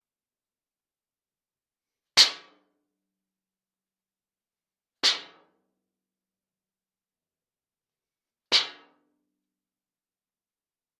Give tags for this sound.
foil
plate
tin

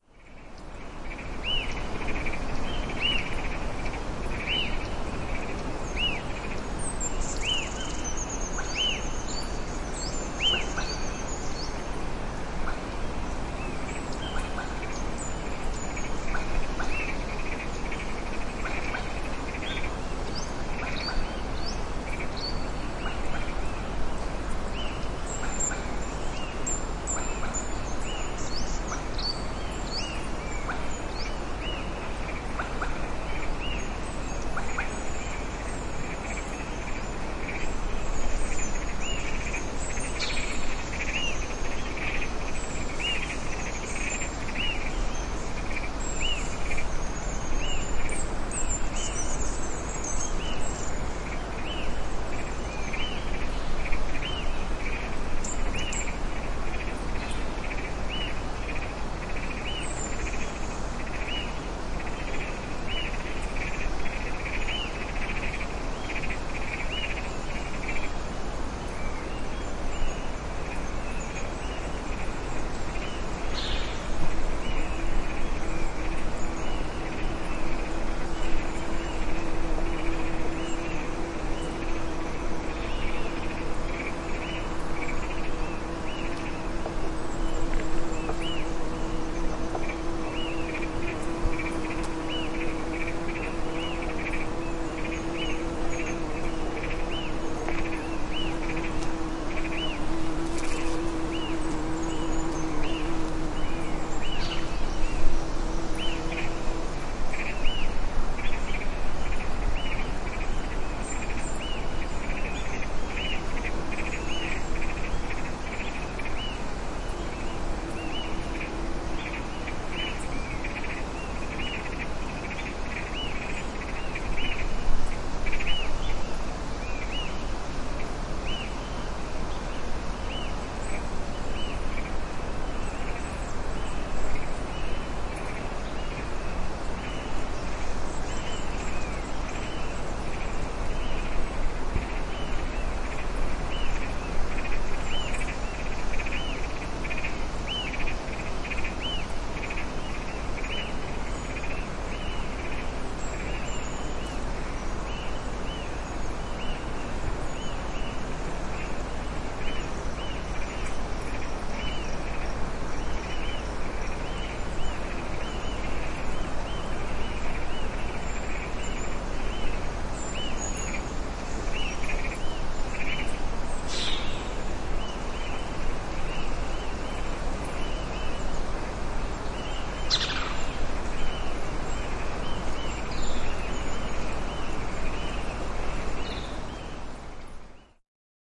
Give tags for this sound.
ambiance
chile
field-recording
futaleufu
nature
summer

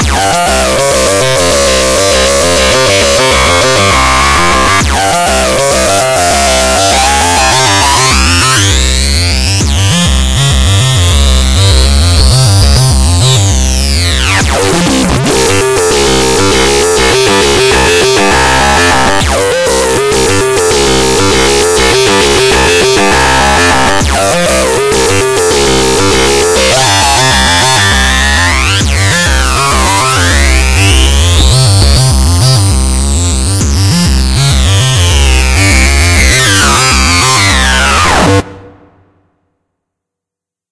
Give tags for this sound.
hard
loop

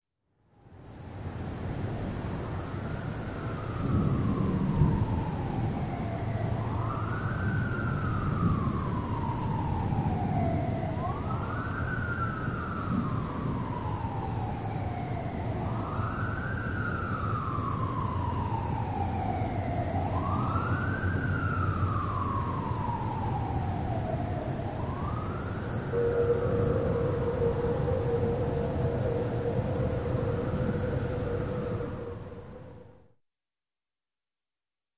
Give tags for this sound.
street
emergency
Alarm
warning
City
big
siren

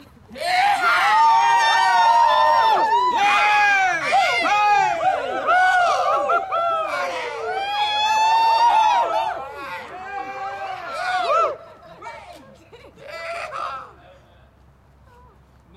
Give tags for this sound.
cheering
people

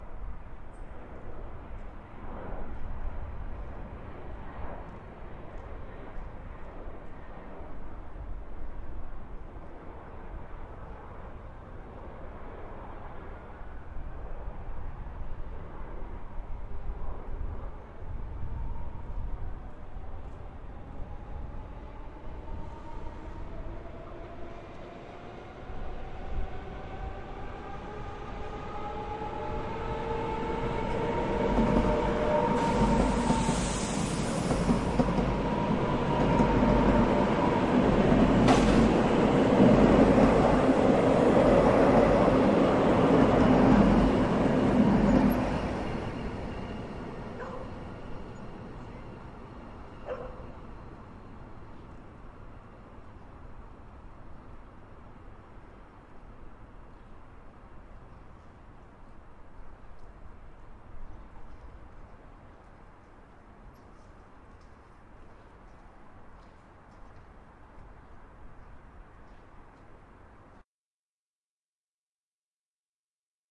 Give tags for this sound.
map
sound

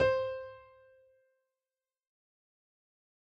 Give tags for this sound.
notes
octave6
piano

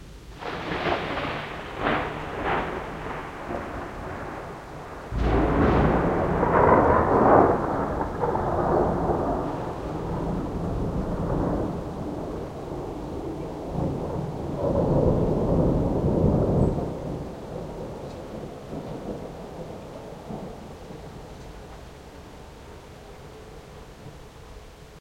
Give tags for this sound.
field-recording
thunderstorm
thunder
streetnoise
rain
thunderclap
nature

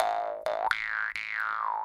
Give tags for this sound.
harp
jaw
khomus
vargan

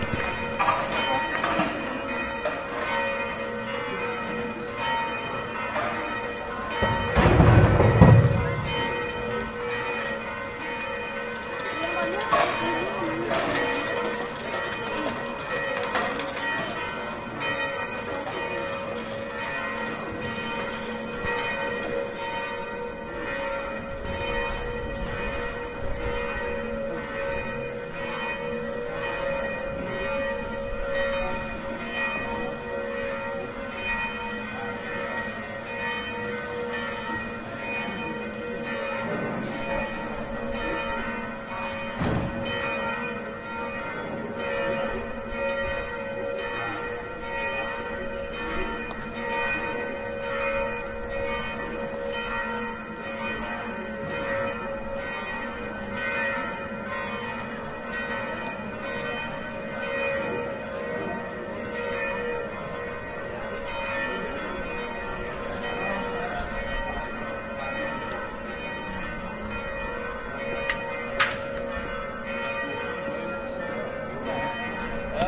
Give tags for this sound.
church
bells
ambience
square
crowd
Prague
shops
cobblestone

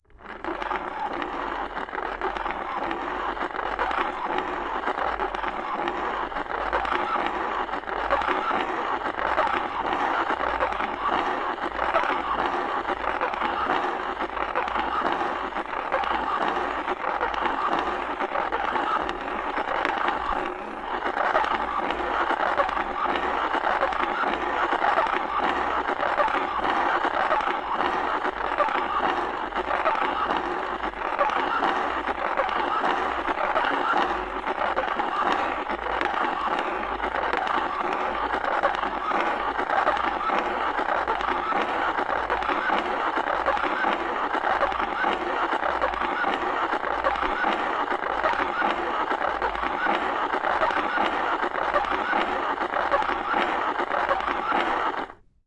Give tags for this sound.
glitch
static
see-n-say
toy
mattel

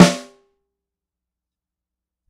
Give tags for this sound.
sm-57
drum
unlayered
Snare
shot

